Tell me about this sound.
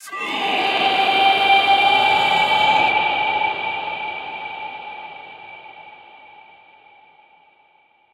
3. of 4 Monster Screams (Dry and with Reverb)
Monster Scream 3 WET